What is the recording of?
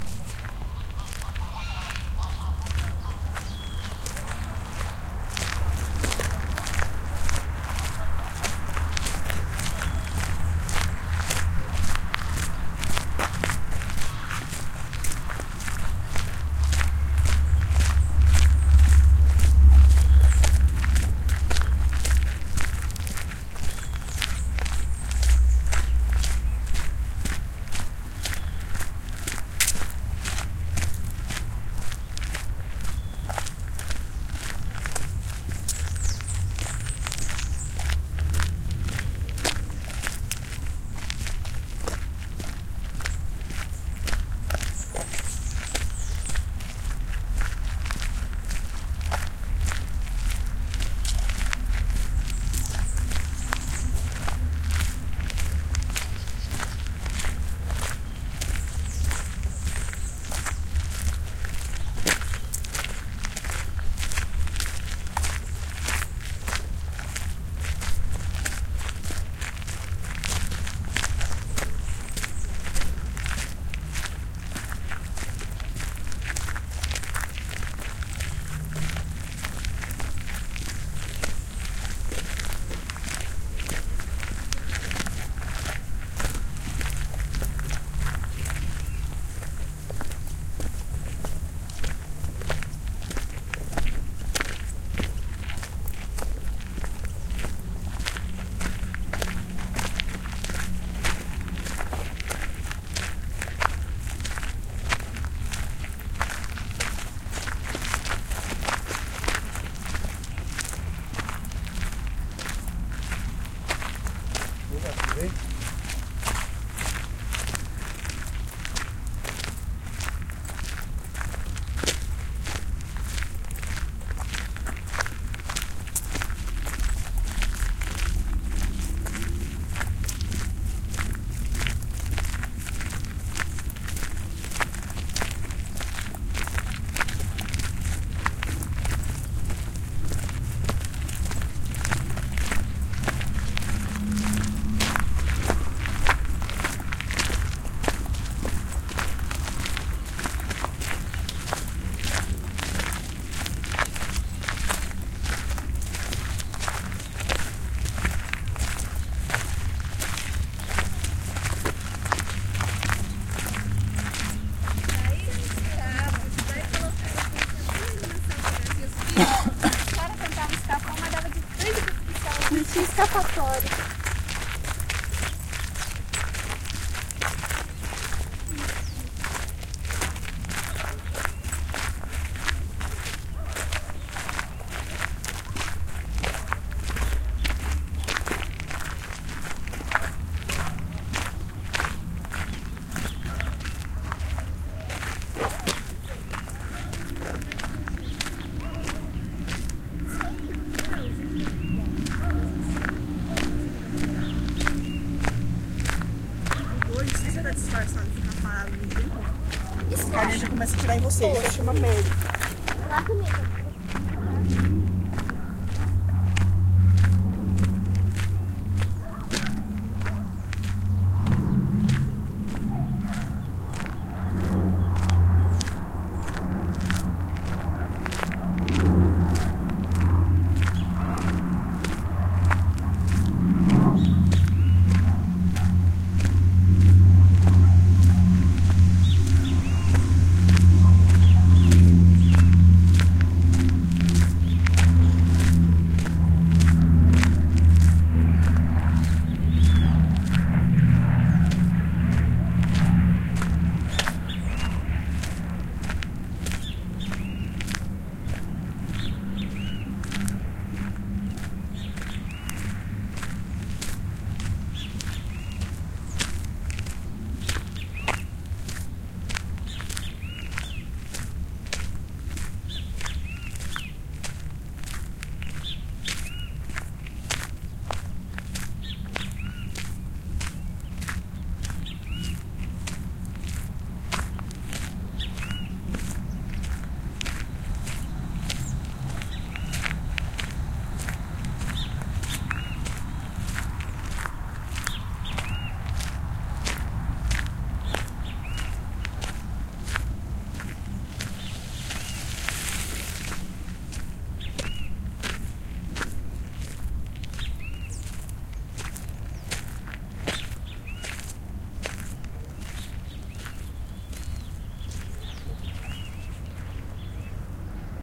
steps in the woods 01
walking in a urban forest, with leaves and foliage on ground.
birds on background.
footsteps, forest, step, steps, walk, walking, woods